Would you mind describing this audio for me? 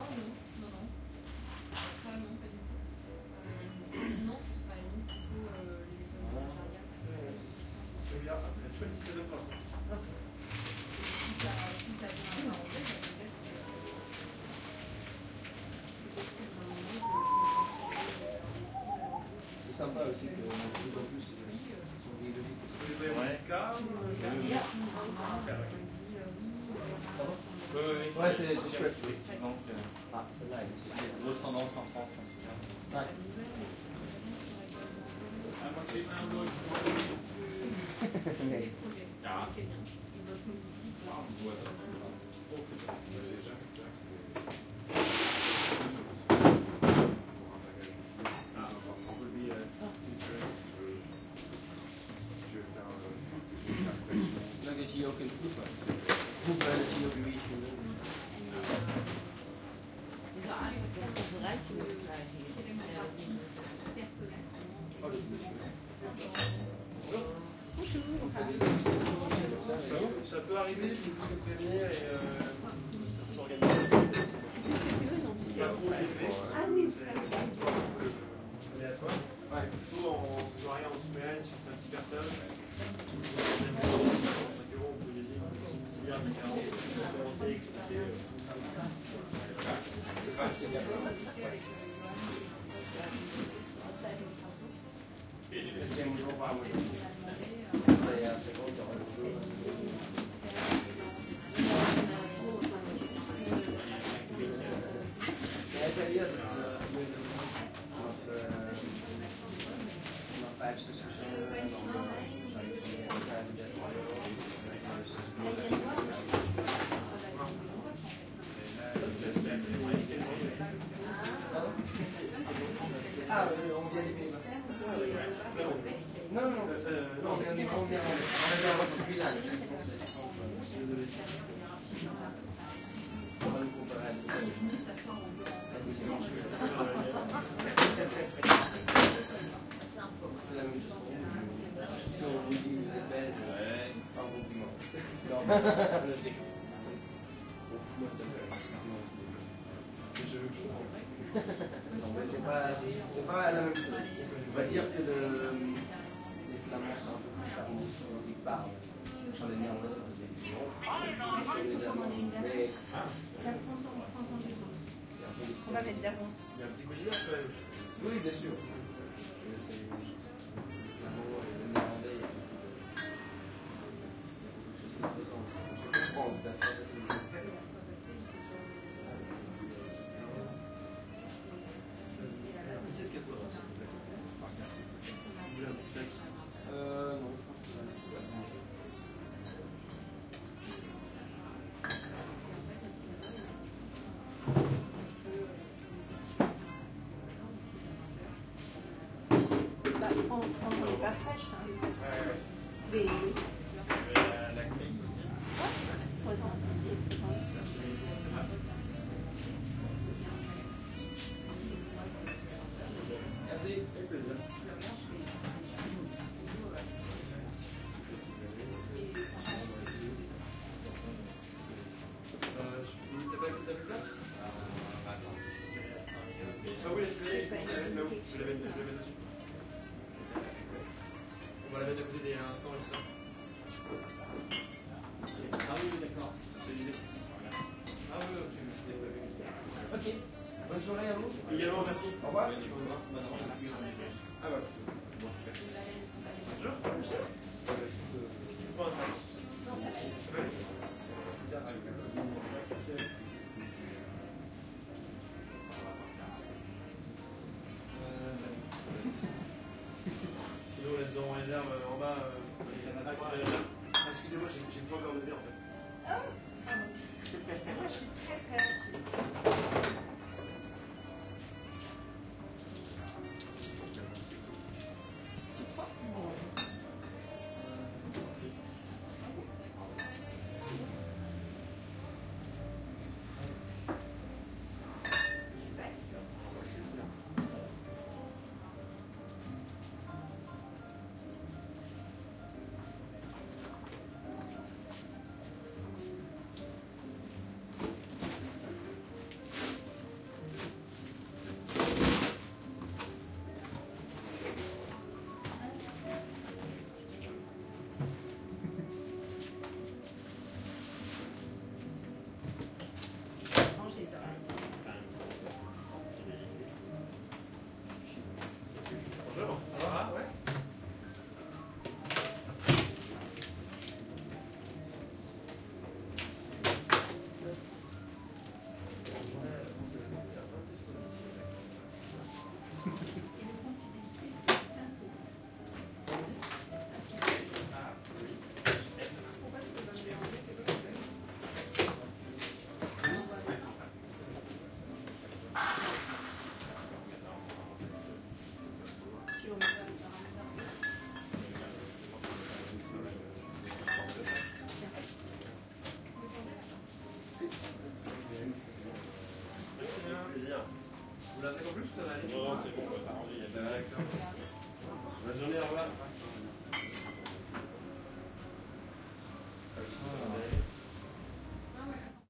Recording of the interior of a beer shop in Paris, people talking, glass noise, jazzy background music.
Recorded using a Sound Devices 633 and two Rode NT5 in ORTF setting.
ambiance, beer, beer-shop, french, glass, paris, people, shop, talk, talking